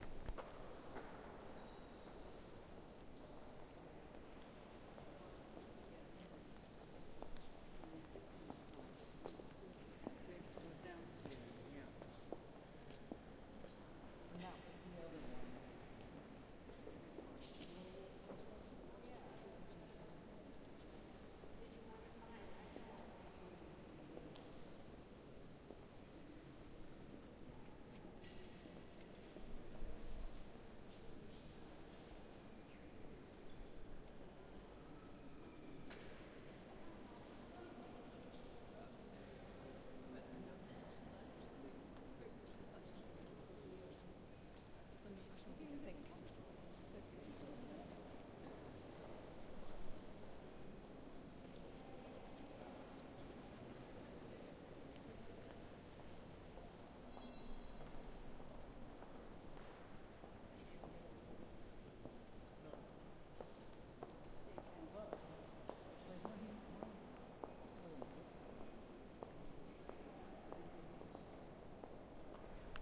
footsteps and talk in a museum, stone floor. In a very big hall(echo)